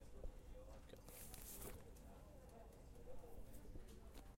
Made this sound in a local library to represent the noise from a book that slides out.